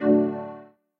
Boot Sound
sounds like the old school apple startup
button, click, game, hi-tech, interface, menu, option, press, select, short, switch, synthetic